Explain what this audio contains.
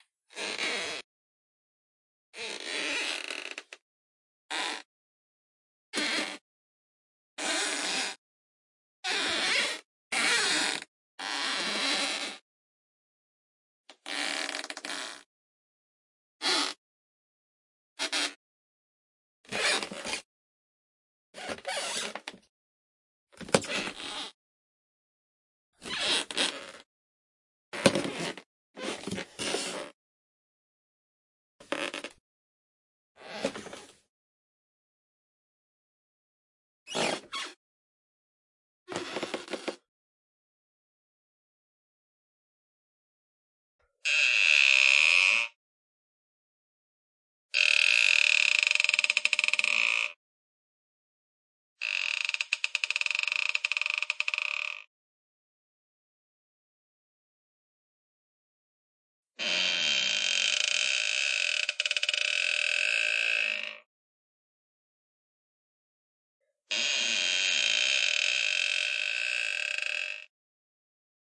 Clean Creaks
Creaks for wood floors or doors
Foot, Wal, Wood